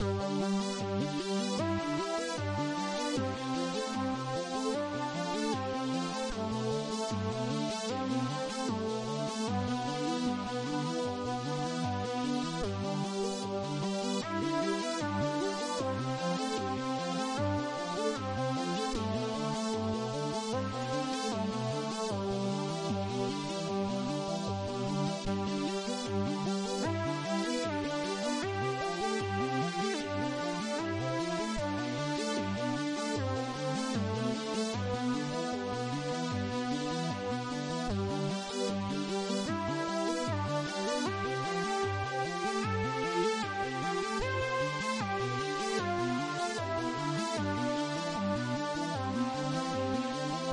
Melodic loop to use in Game production.
bright, game, hope, loop, melody, music, synth